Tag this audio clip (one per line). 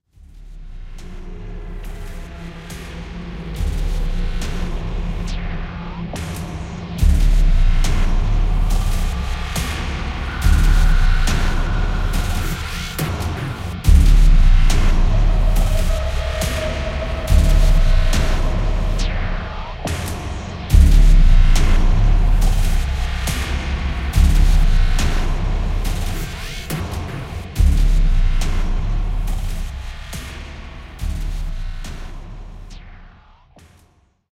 Action
Horror
Scary
Scream
Sub